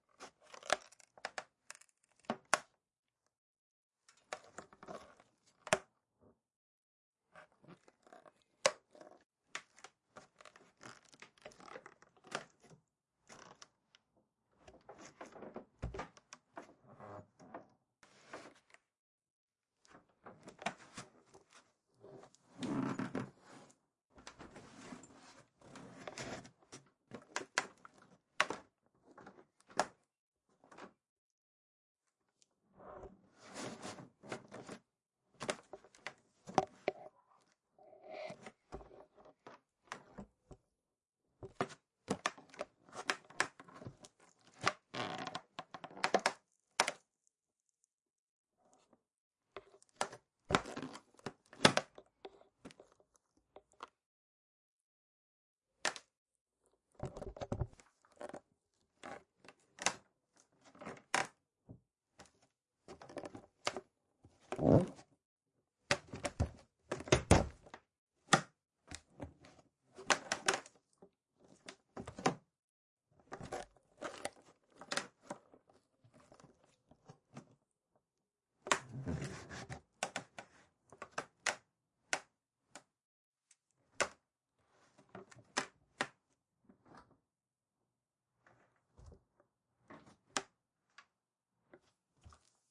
Shed Creaks
Parts of a shed being pushed and pulled from the inside.
creaky, soft, bending, floor, wood, squeaky, hinge, creaks